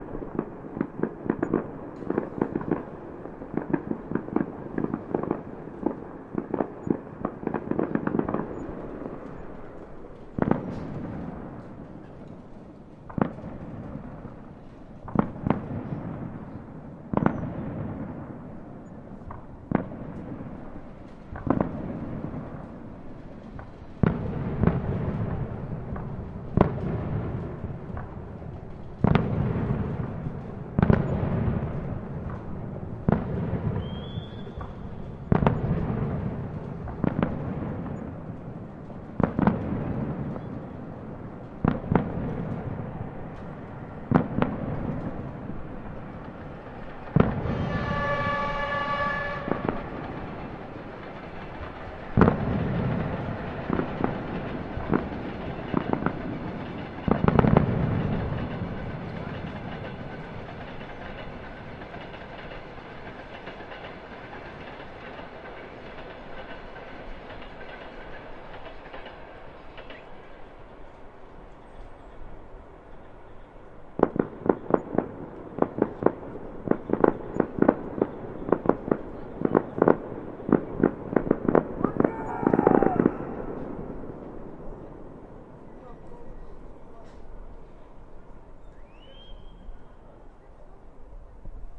Fireworks 9th of may
The Victory day on 9th of may.
Recorded with zoom H5